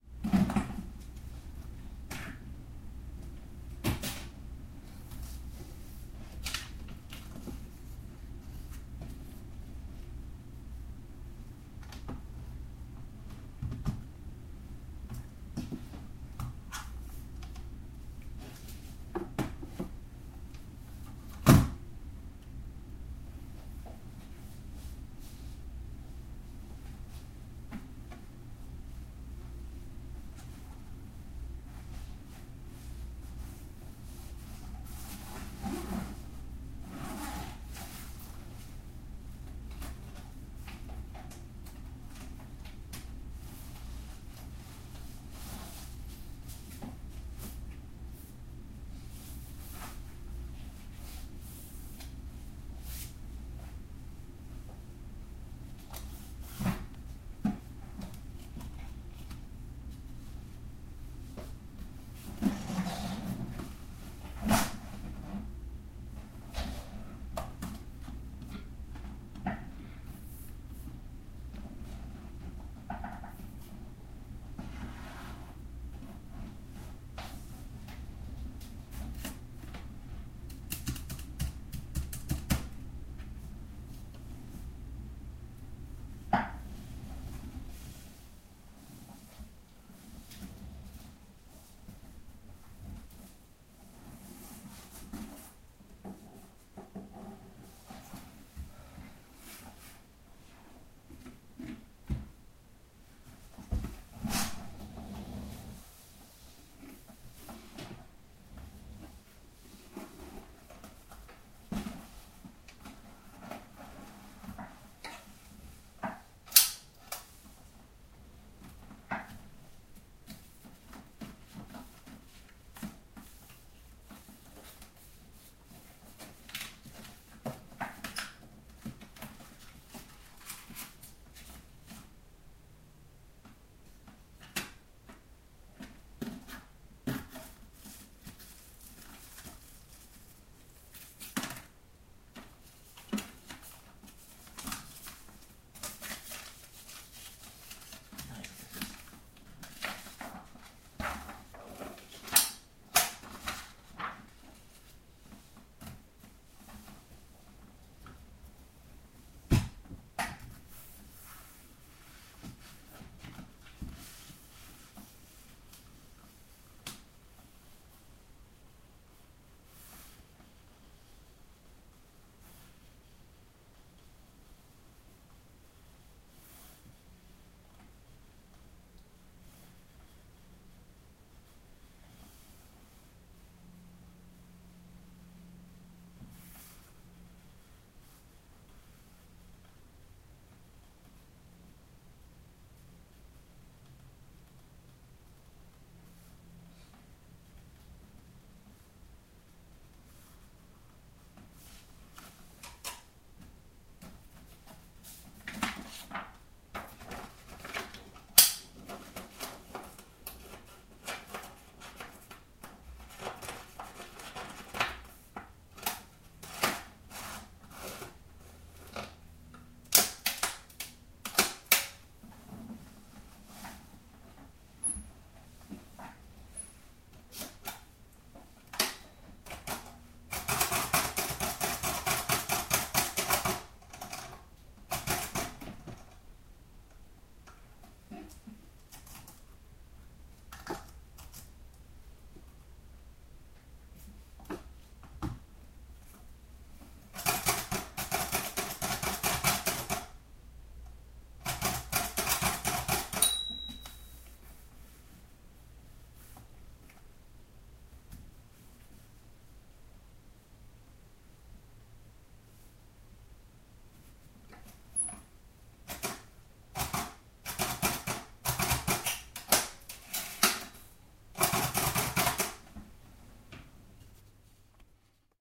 person typing on typewriter
Unpacking my brailer and putting paper in, then typing.
education, prep, preparations, school, type, typing, unpack, work, writing